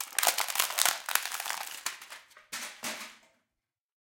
3 PLASTIC CUPS CRUSH AND DISPOSE C617 001
There were about twenty coke cans, four plastic cups, a garbage pail and an empty Culligan water bottle. These were arranged in various configurations and then kicked, dropped, smashed, crushed or otherwise mutilated. The sources were recorded with four Josephson microphones — two C42s and two C617s — directly to Pro Tools through NPNG preamps. Final edits were performed in Cool Edit Pro. The C42s are directional and these recordings have been left 'as is'. However most of the omnidirectional C617 tracks have been slowed down to half speed to give a much bigger sound. Recorded by Zach Greenhorn and Reid Andreae at Pulsworks Audio Arts.
metal, c617, plastic, half, c42, destruction, rubbish, josephson, chaos, smash, coke, npng, hit, empty, can, destroy, cup, metallic, impact, speed, pail, crush, bottle, drop, dispose, container, thud, garbage, bin, crash